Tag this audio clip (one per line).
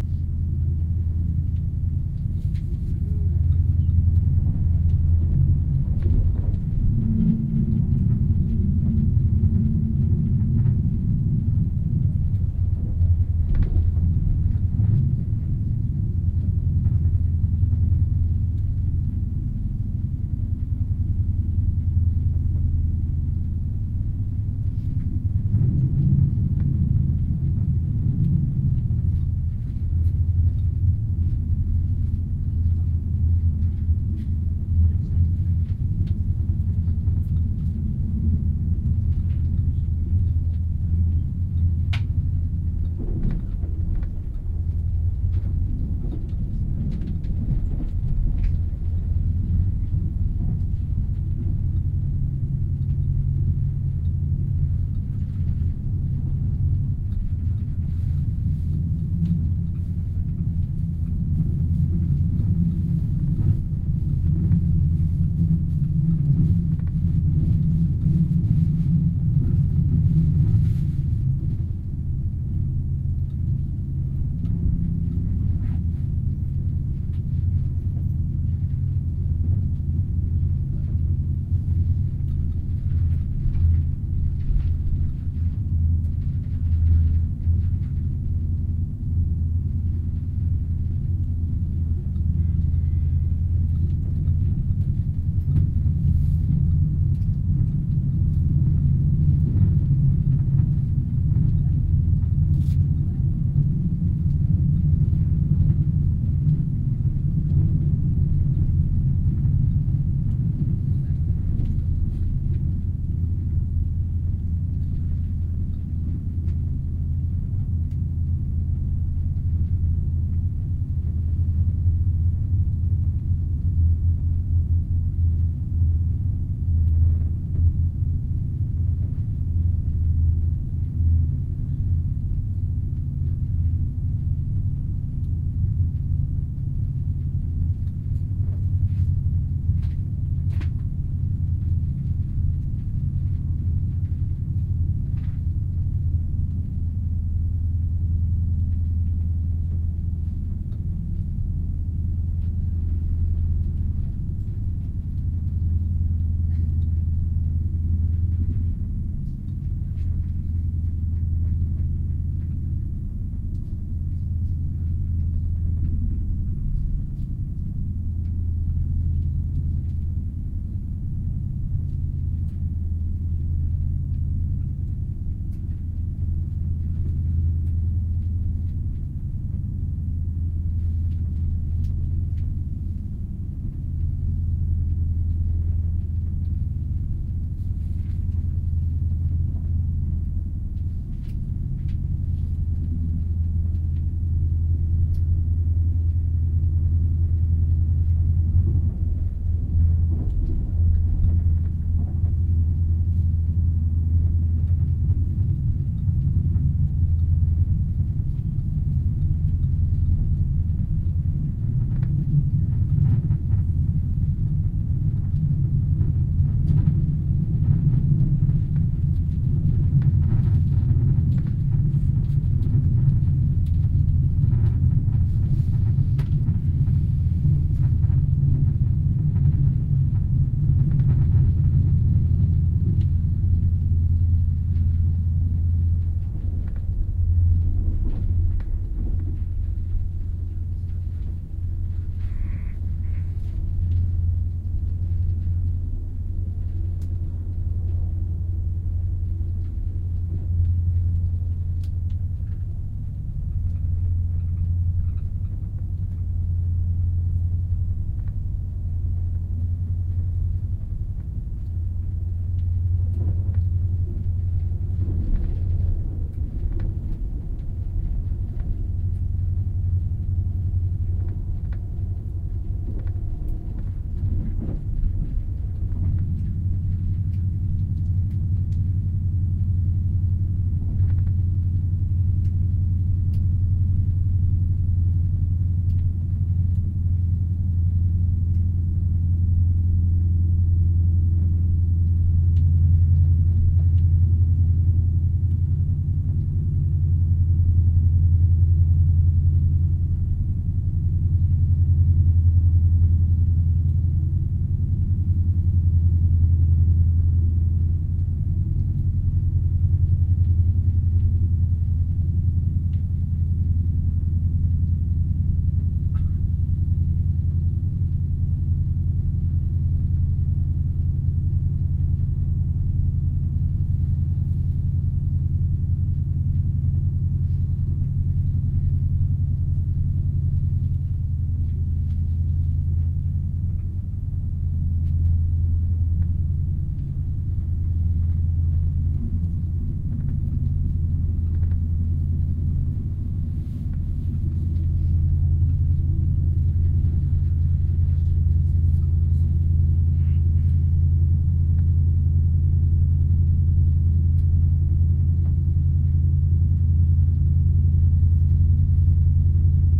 binaural
fasttrain
ice
train